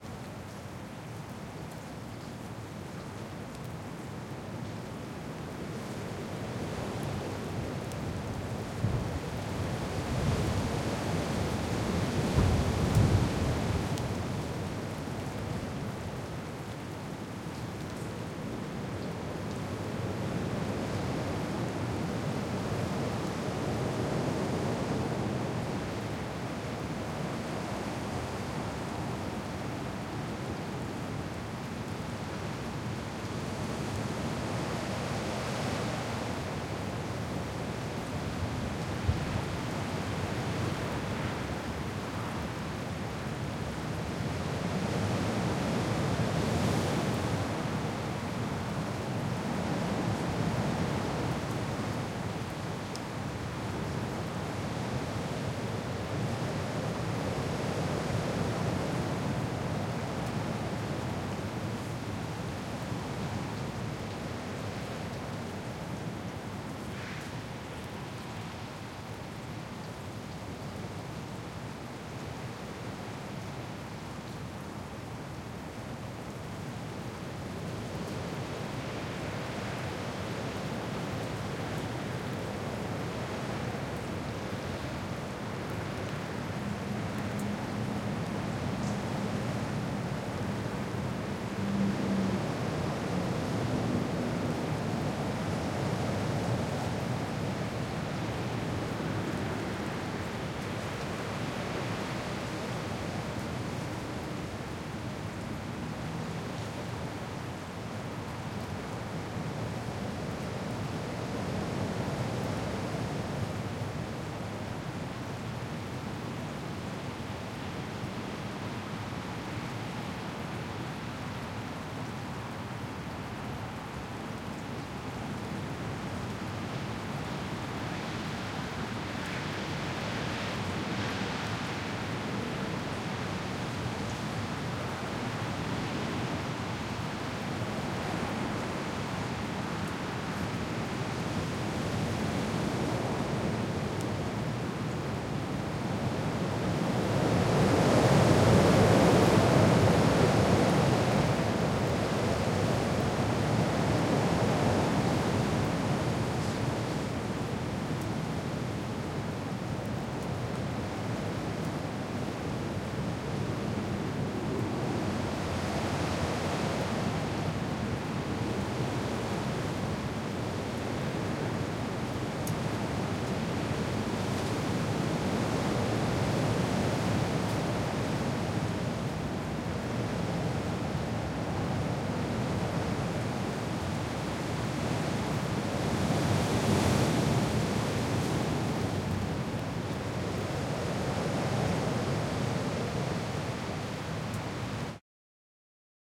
Recorded this in the garden of my Airbnb, amazed by the power of nature in Iceland
field-recording, Iceland, rain, Reykjavik, storm, weather, wind